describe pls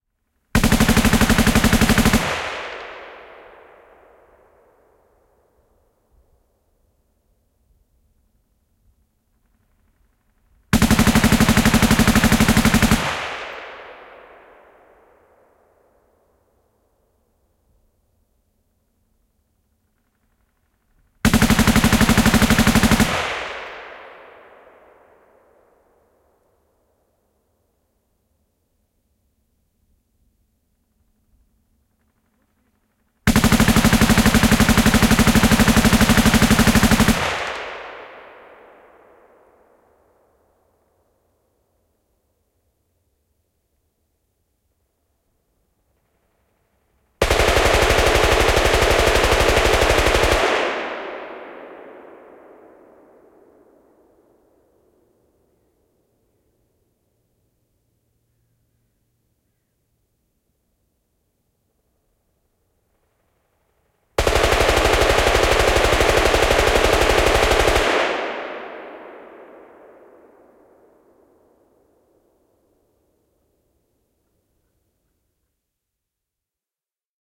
Konekivääri, sarjatuli, ampuminen / A machine gun with an accelerator, Russian Maxim, shooting, sustained fire, variable distances
Venäläinen kk Maxim. Sarjatulta kiihdyttäjän kanssa, kaikua. Viimeiset sarjat kauempana.
Paikka/Place: Suomi / Finland / Hämeenlinna, Hätilä
Aika/Date: 01.11.1984
Ammunta, Ampuminen, Ase, Aseet, Field-Recording, Finland, Finnish-Broadcasting-Company, Firing, Gun, Gunshot, Konetuliase, Laukaukset, Laukaus, Shooting, Shot, Soundfx, Suomi, Sustained-fire, Tehosteet, Weapon, Yle, Yleisradio